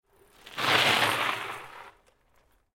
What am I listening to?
Braking on gravel (bike)
I needed sound of a car braking on gavel, but I could not find it. So i record my bike on gravel and it did a thing...
brake, tires, gravel, brakes, screech, tire